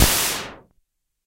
Poly800 Noize Explosion
Self created patch on my Korg Poly 800 MKI (inversed keys, as if that would matter ;))